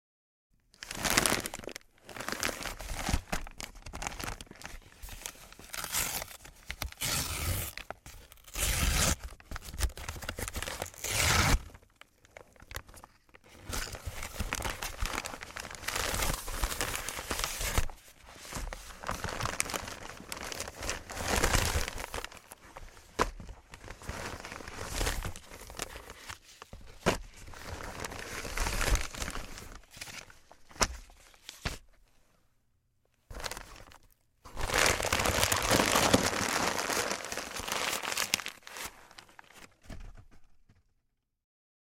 Handling and opening a large brown paper padded envelope from Amazon. Ripping the package open after handling it. Pulling out the items inside. Rustling items around. Setting items down on a desk. Crumpling the envelope.
Recorded in my studio on a Neumann TLM 103 microphone.
amazon, craft-paper, envelope, foley, handle, mailer, opening-package, packaging, paper, perforation, rip, rustle, shake, shipment, shipping-material, squish, tap, tape